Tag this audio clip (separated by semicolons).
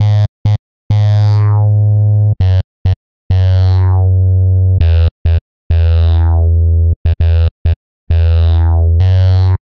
loop; dance; bass; techno; electro; electronic; synth; trance